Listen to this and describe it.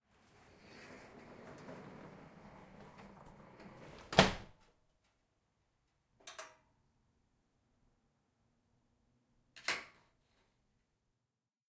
My rickety old garage door shut, latched and locking pin slid in